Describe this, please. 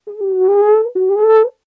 Rhinoceros Trumpet Expressive 2
Rhinos do not actually trumpet, but in Ionesco's play Rhinoceros they do. This is the sound of a fictional trumpeting rhinoceros created using a French horn and some editing. The rhinoceros is expressing a longing for something. Thanks to Anna Ramon for playing the french horn.
animal, elephant, expressive, french-horn, grunt, longing, rhino, rhinoceros, sad, trumpet, wistful